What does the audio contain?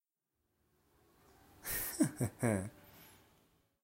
44) Cait laugh
foley for my final assignment, an attempt at a sassy giggle
giggle, foley, laugh